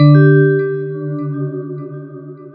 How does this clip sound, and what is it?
UI Correct button3
game button ui menu click option select switch interface